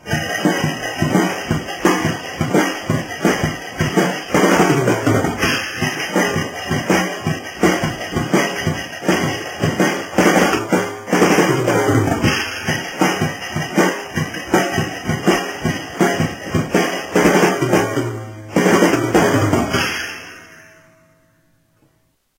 REKiddrums4Elements
beat, dirty, drum, lofi, loop, percussion, roll